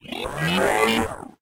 A synthetic voice sound effect useful for a somewhat confused robot to give your game extra depth and awesomeness - perfect for futuristic and sci-fi games.